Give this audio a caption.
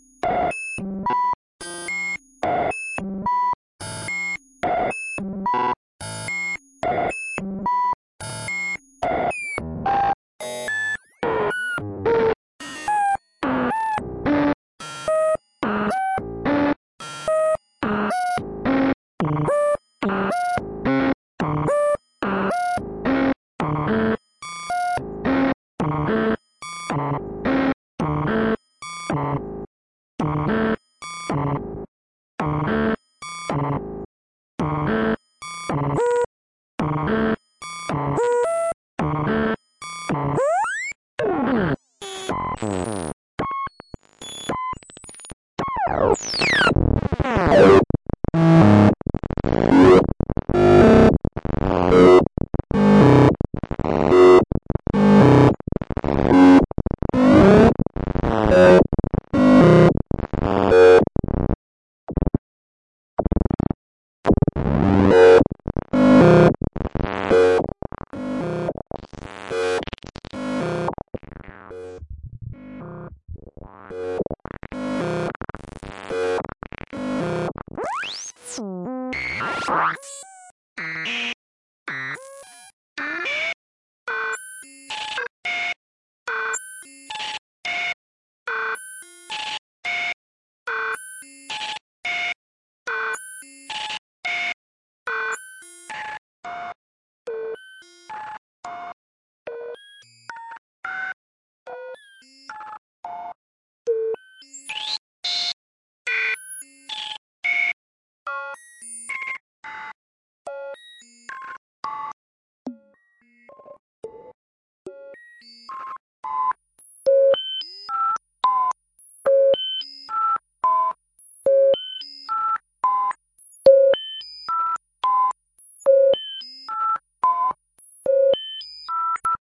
One in a series of long strange sounds and sequences while turning knobs and pushing buttons on a Synthi A.
weird, synthi, sound-design, synthesis